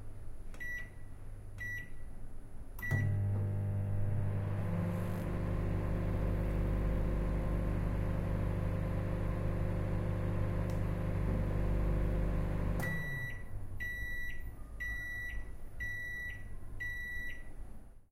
Recording of a microwave, including setting it up and the end signal repetition.
food, Microwave, cooking